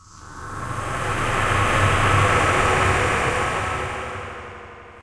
Industrial sweeping sound.
factory, industrial, machine, machinery, mechanical, noise, robot, robotic, sweeping